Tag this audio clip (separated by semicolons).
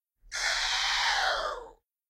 creature; beast; monstrous; roar; lizard; monster; growl; animal